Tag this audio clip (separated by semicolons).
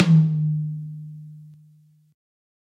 drum; drumset; high; kit; pack; realistic; set; tom